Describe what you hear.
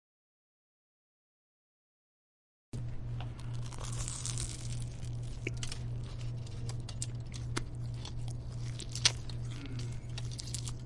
Man chewing a jimmy jons turkey sandwich